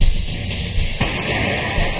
convoluted back to back loop 60 bpm 1a
This is loop 1 in a series of 40 loops that belong together. They all have a deep dubspace feel at 60 bpm and belong to the "Convoloops pack 01 - back to back dubspace 60 bpm" sample pack. They all have the same name: "convoluted back to back loop 60 bpm"
with a number and letter suffix (1a till 5h). Each group with the same
number but with different letters are based on the same sounds and
feel. The most rhythmic ones are these with suffix a till d and these
with e till h are more effects. They were created using the microtonik VSTi.
I took the back to back preset and convoluted it with some variations
of itself. After this process I added some more convolution with
another SIR, a resonator effect from MHC, and some more character with (you never guess it) the excellent Character plugin from my TC powercore firewire. All this was done within Cubase SX.
After that I mastered these loops within Wavelab using several plugins:
fades, equalising, multiband compressing, limiting & dither.